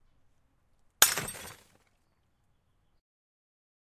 breaking a glass
Sound of a glass falling and breaking.
breaking, glass, OWI, shatter